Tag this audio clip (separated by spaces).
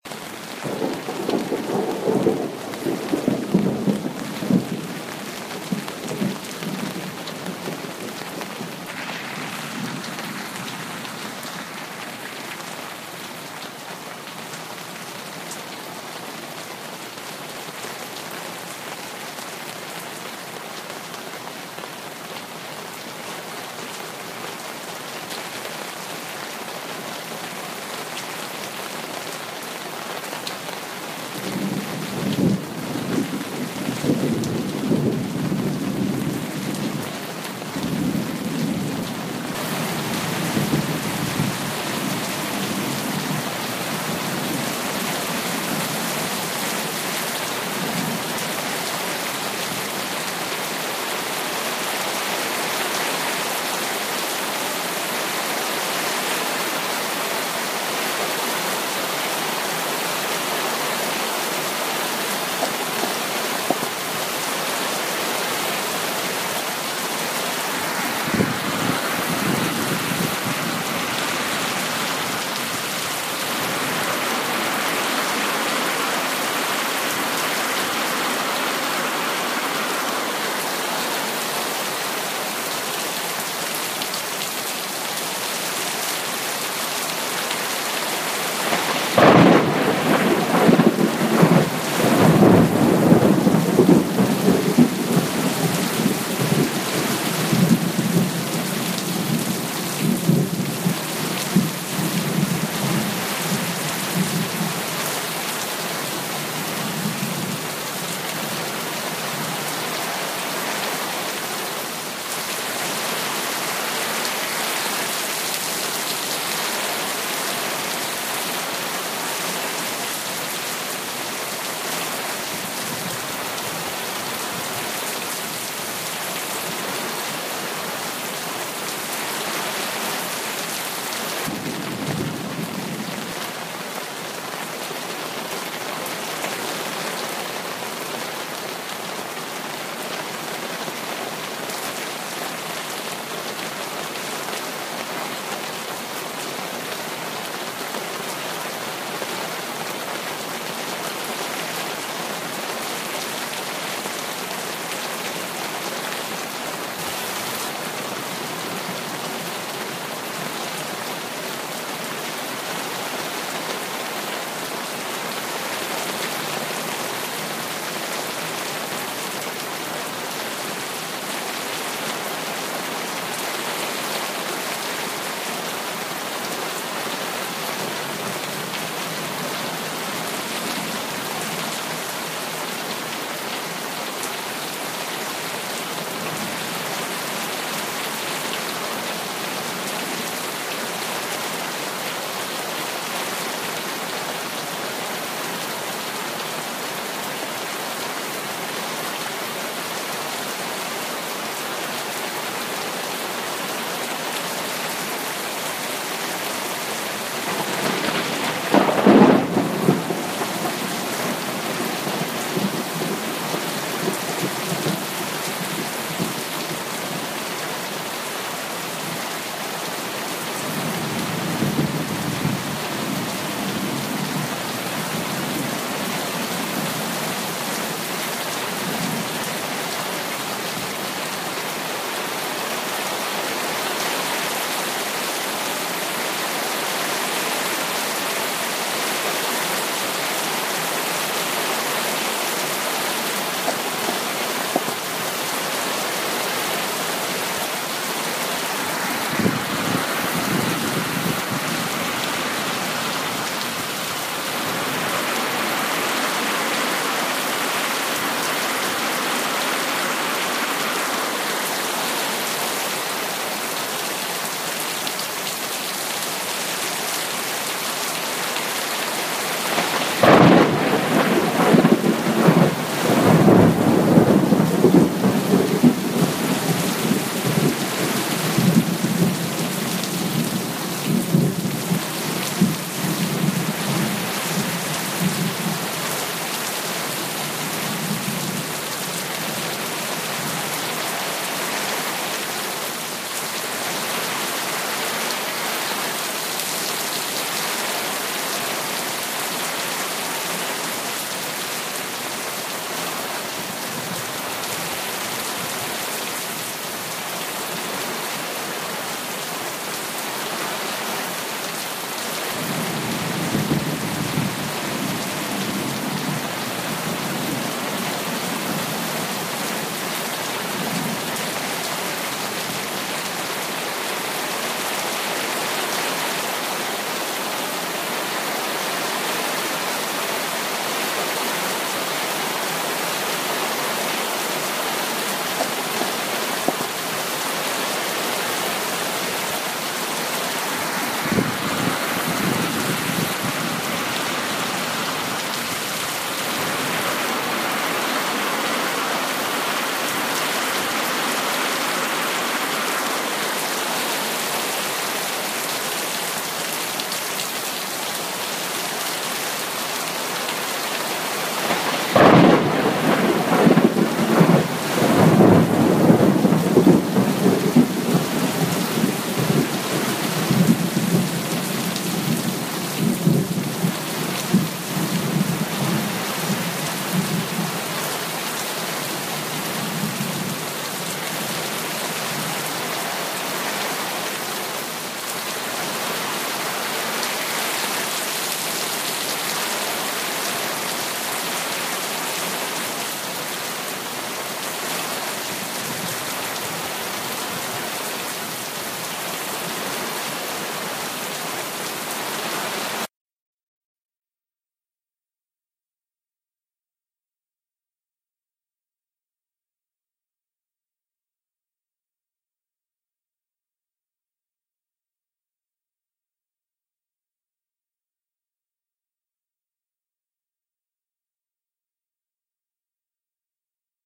lightning rain nature thunderstorm weather thunder storm thunder-storm